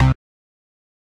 Synth Bass 026
A collection of Samples, sampled from the Nord Lead.
nord, lead, synth